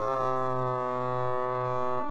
Canada Goose Expanded 3
A time expanded goose, sounds a little like a stringed instrument or some type of horn - weird!